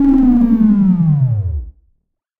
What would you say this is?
Similar to "Attack Zound-03" but with a longer decay. This sound was created using the Waldorf Attack VSTi within Cubase SX.